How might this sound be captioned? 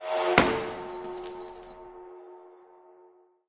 Epic Logo Intro part 2: Glass and Machine
Made for this request in Audacity. .aup available here.
Some strange machine makes a sound and then glass breaks.
Part of what can be seen in: